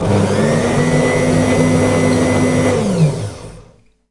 schnurr - 03 (loop)
Samples of tools used in the kitchen, recorded in the kitchen with an SM57 into an EMI 62m (Edirol).
bread-cutter, hit, kitchen, machine, percussion, unprocessed